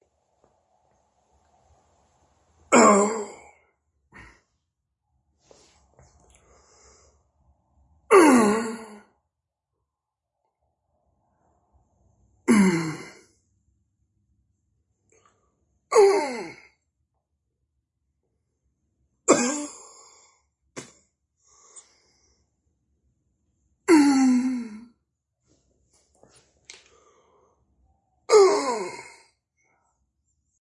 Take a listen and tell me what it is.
Content warning
Man dies diffrent sound.
pain, painfull, scream